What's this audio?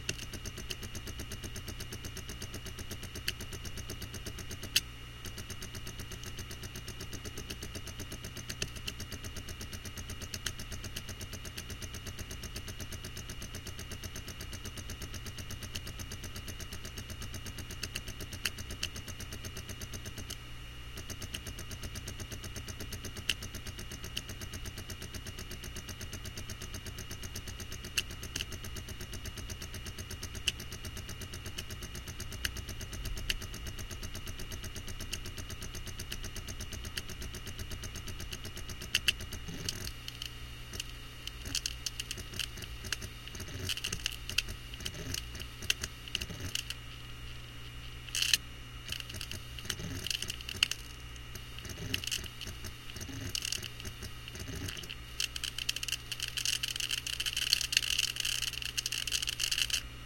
MTrk Internal Hard Drive Defrag Clicks Clanks 3 FSP4824
There are three of these files. I used the Microtrack to record them. I put the mic on an extension and stuffed it inside of an already noisy drive and ran a defrag. These files are samples of the different types of noise that I got. Basically it's a close perspective on a working/struggling hard drive.